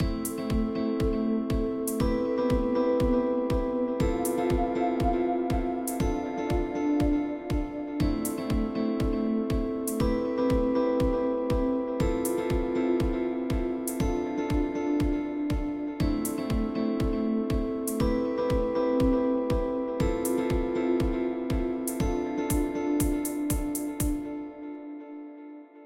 Guitar loop and drums
A loop created in Ableton using a guitar plugin, drum samples and a pad.
drums, loop, guitar